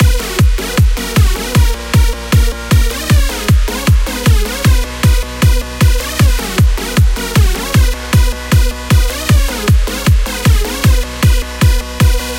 F Sharp 155 BPM Cheesy Hard Dance Euro Loop
This is a Hard Dance loop running at 155 BPM.
155-BPM, Dance, EDM, Electric, Electric-Dance-Music, F-Sharp, Loop, Music